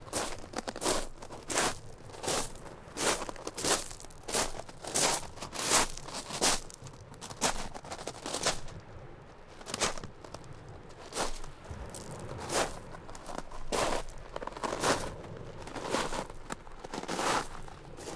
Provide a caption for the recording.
footsteps pebble each 01
walking on a pebble beach
walking, pebble-beach, footsteps